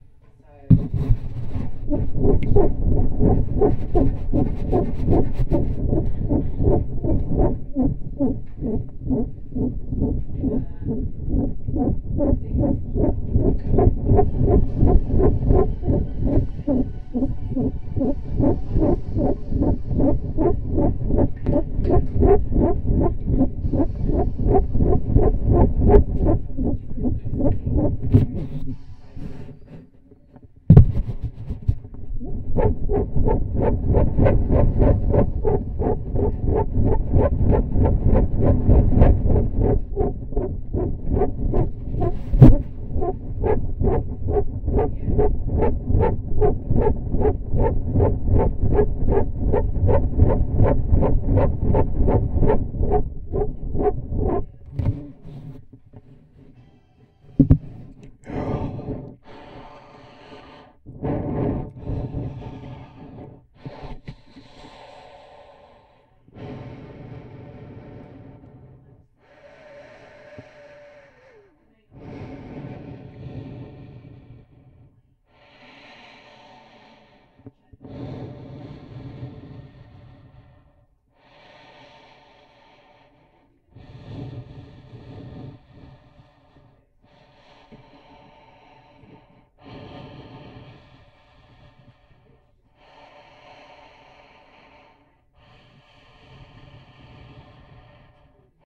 wind tube
A recording of a platic tube rotation using a DIY mic inside the tube. Second part of the sound has a breathing sound.